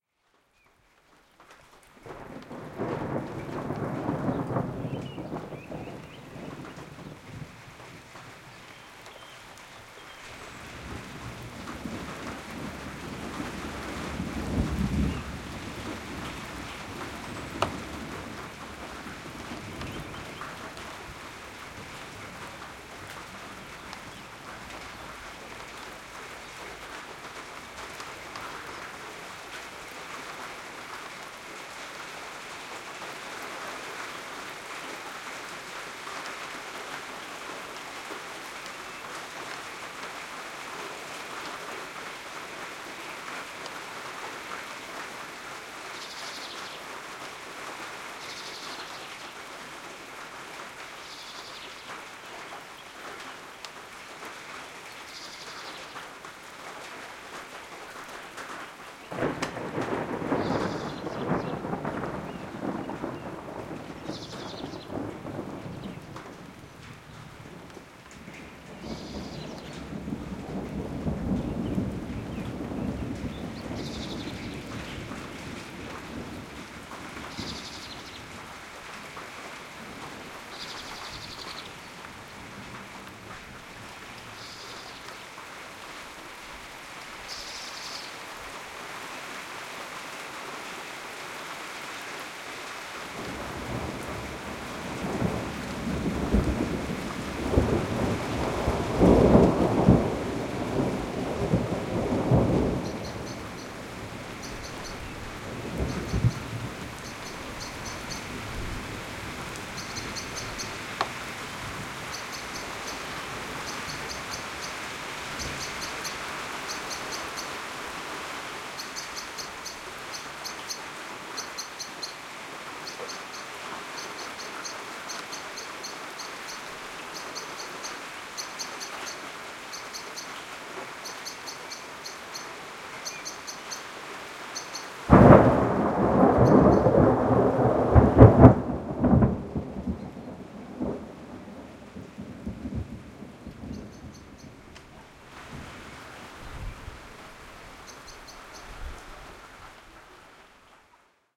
The first spring storm. The sound of rain, birds singing, thunder. April 2020.